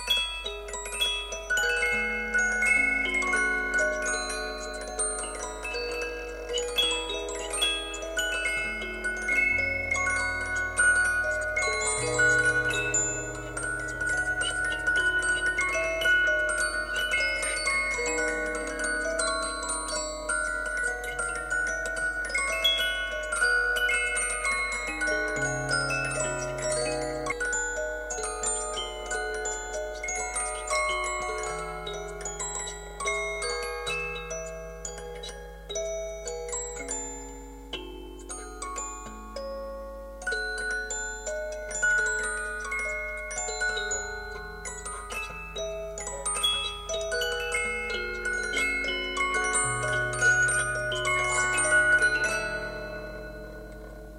I have refurbished the 150 years old musuc box. Now a little bit richer sound, n'............ c'....... est...... pas,,,,zzzzzzzzzzzzzzz